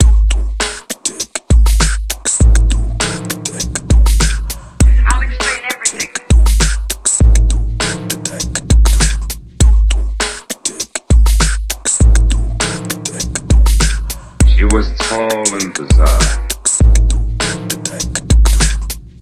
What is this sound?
Tall and Bizzare 100bpm
Minimal beats with voice sample. 8 Bars. Girl meets boy.
Chill, Downbeat, Loop, Minimal, Samples